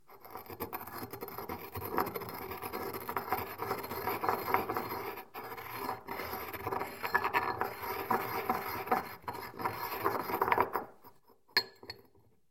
Simple recording of a Pestle and mortar grinding salt for those who don't have access to one or the equipment used for recording them.
coffee, field-recording, grind, grinder, grinding, machine, mill, mortar, noise, Pestle, Pestle-and-mortar, salt